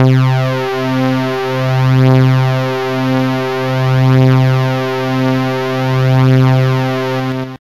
Detuned sawtooth waves
detuned, saw